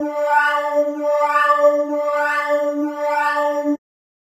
Hello,
This is the sample pack that I completed for the Sound Design course at Slam Academy.
I used Ableton’s Operator and Analog synthesizers and Xfer’s Serum plugin to create this sounds.
All processing was used using Ableton’s stock effects and Serum’s stock effects.
I hope you enjoy my sounds :)
- MilesPerHour